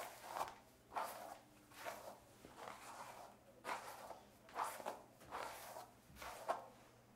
Brushing Hair
class, sound